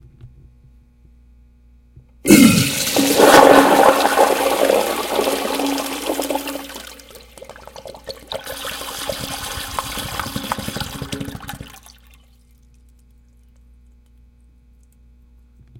Public bathroom urinal flush. Recorded with Zoom H2n.